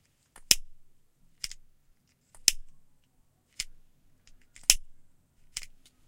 noise of a cigarette lighter, recorded using Audiotechnica BP4025, Shure FP24 preamp, PCM-M10 recorder
cigarette, clipper, collection, disposable, flame, gas, ignition, lighter, smoking, spark, tobacco, zippo